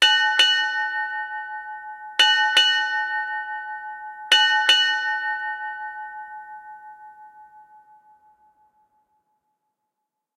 Six Bells,Ship Time
As early as the 15th Century a bell was used to sound the time on board a ship. The bell was rung every half hour of the 4 hour watch.Even numbers were in pairs, odd numbers in pairs and singles.
ding, seafaring, time, 6-bells, ships-bell, nautical, bell, sailing, maritime, naval, ship